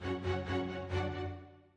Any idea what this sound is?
Tension Strings F Sharp
These sounds are samples taken from our 'Music Based on Final Fantasy' album which will be released on 25th April 2017.